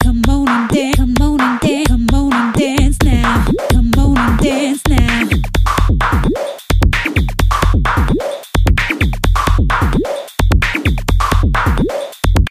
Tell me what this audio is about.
coming dance
a song for dance
120bpm, beat, break, breakbeat, distorted, drum, funk, hip, hop, loop, sfx, trace